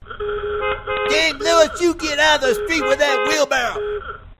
Get out of the Street
James Lewis has taken his wheelbarrow into the street, there are car horns from:
traffic, horn, ringtone, car, anger, voice